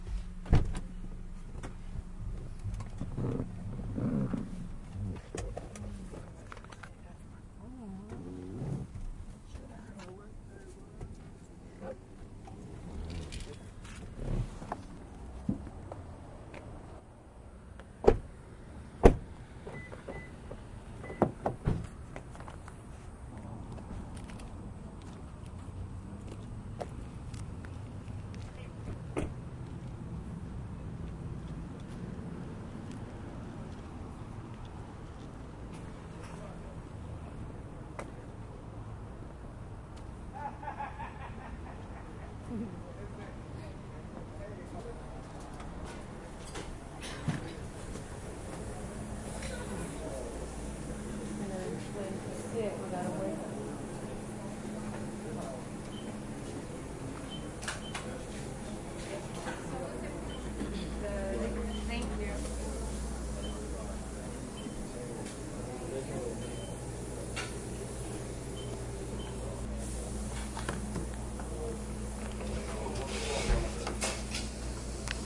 This is the sequence of entering a waffle house restaurant. From getting out of the truck to being seated.